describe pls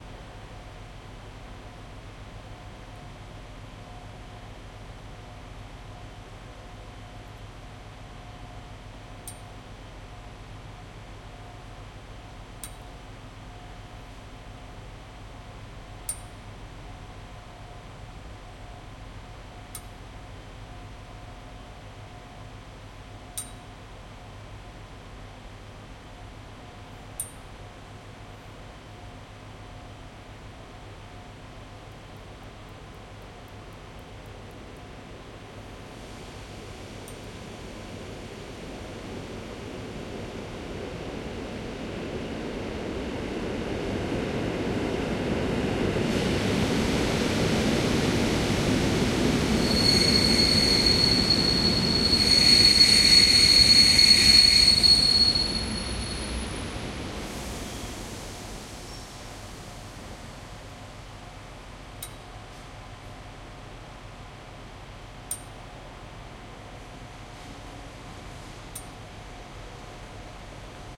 railway station 7
Krakow railway station ambience
rail, railroad, railway, railway-station, station, train, trains